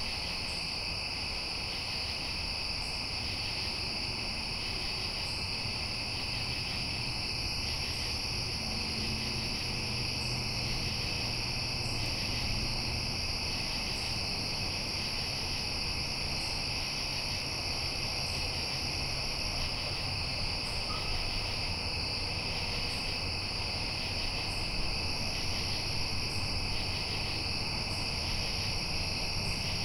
Night Sounds, Summer Country
Summer country night sounds in CT. Highway is in back ground one mile away. Notice at 8.6 sec. there is an unidentifiable brief squeak. Can someone tell me what it is? Recorded with Rode NT4 stereo mic.and Edirol R-44 recorder.
Country, Nature, Night, Ambient, Summer